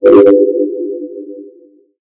Sounds like you are in a futuristic city terminal. This is of course meant to represent only one of many sounds - it is not complete without other futuristic sounds (if you want to make a futuristic city terminal atmosphere!).
This sound can for example be used in sci-fi games, for example when the player is walking in a huge futuristic city terminal, or is interacting with an AI - you name it!
If you enjoyed the sound, please STAR, COMMENT, SPREAD THE WORD!🗣 It really helps!
ai, artificial, automation, central, city, computer, data, electronics, futuristic, high-tech, intelligence, robot, tech, technology, terminal